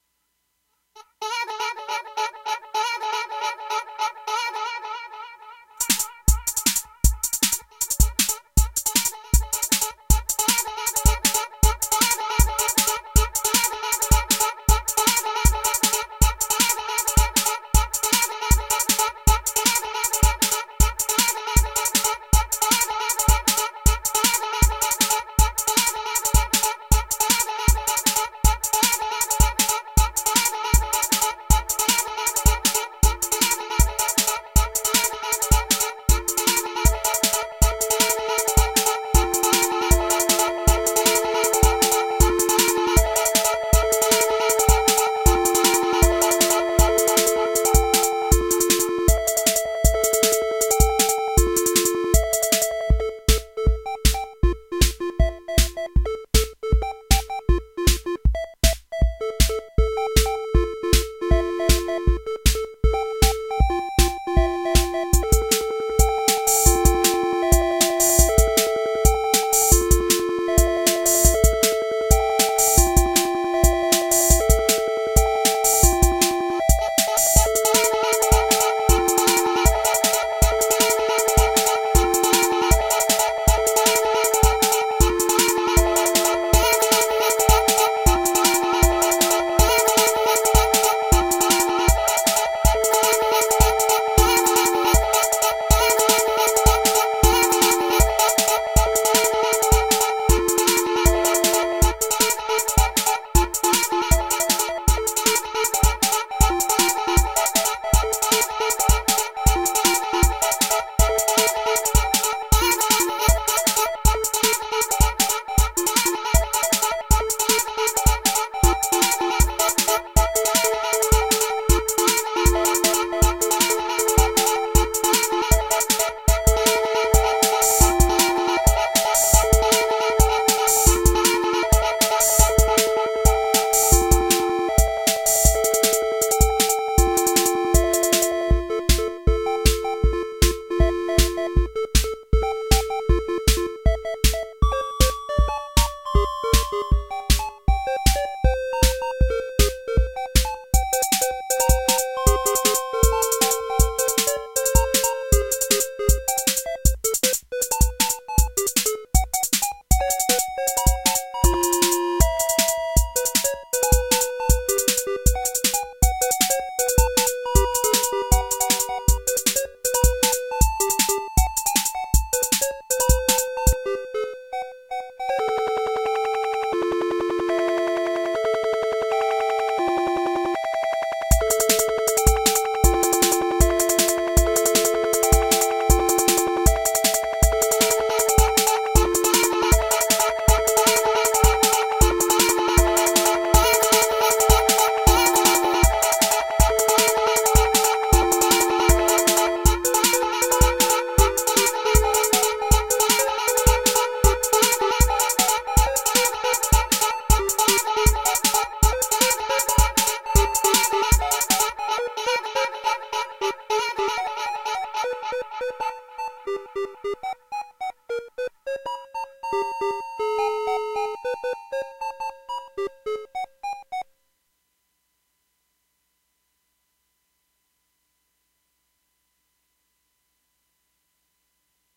shiz mtton
quick jam on minilogue and tr606
chiptune, dance, electro, electronic, fun, girl, high, high-pitched, improv, loop, minilogue, music, pitched, pop, punk, rave, square, synth, tr606, vocal, vox, wave, weird